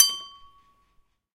tapping a glass in the kitchen
tapping, bell, glass